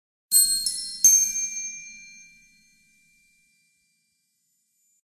Playing Sample Science glockenspiel. It sounds like winter.